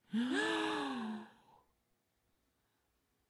Two people gasping in astonishment. Recorded with SM58 to a Dell notebook with an audigy soundcard.
alarm, breath, gasp, human, surprise